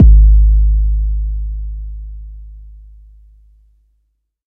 808 style bassdrum made with Roland TR 808 and Elektron Analog Rytm.
rnb, drums, kick, kickdrum, hiphop, trap, bassdrum, oneshot, analog, 808